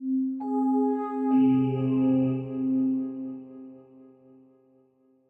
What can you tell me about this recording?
Magic 2.Wouw+SinePad
While making an ambient track simulating a fortune teller's caravan, I designed 3 sounds in Pro Tools by layering and editing presets within Ambience and Soft Pads. They add a bit of magical flare when the tarot card reader turns over a card.
This sound can be used for any kind of transition, item acquisition, quest accomplished, or other quick sound effect which needs a light, magical quality.
Video-Game, Quick, Task, Sound-Design, Complete, Light, Mystical, Magic